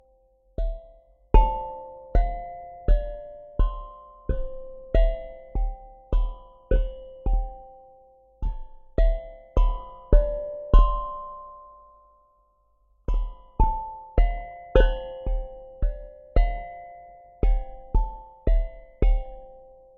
2023-01-07-childrens-toy-2x-contact-005
toy instrument recorded with contact microphones